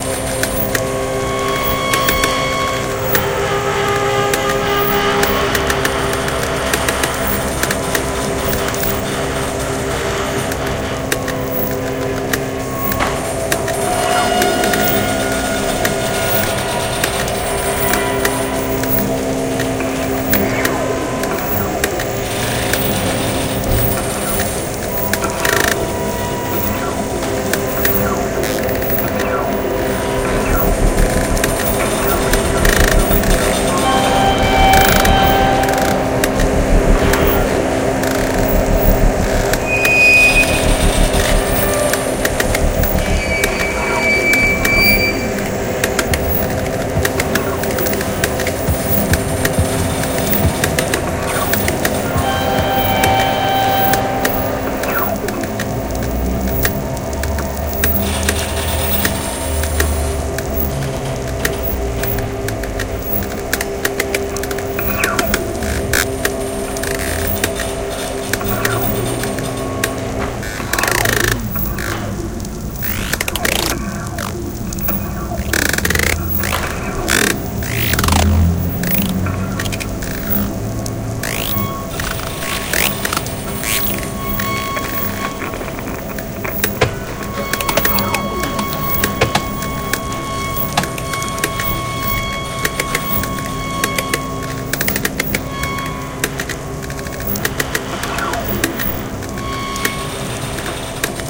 city buzz noise